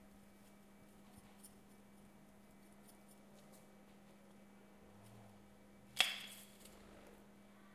mySound JPPT6 Hugo
Sounds from objects that are beloved to the participant pupils at Colégio João Paulo II school, Braga, Portugal.